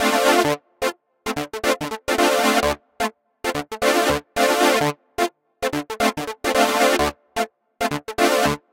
D Minor Solo Synth Funk Loop 110bpm
Funky solo saw synth loop to beef up your groovy track! I've made one in every minor key, all at 110bpm for maximum percussive funk!
synth, funk, loop